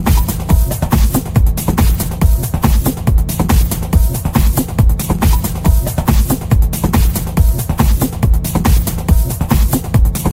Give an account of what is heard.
minimal techno loop 1.
bounce, club, dance, dj, drum, electronic, kick, minimal, mix, original, rave, sound, techno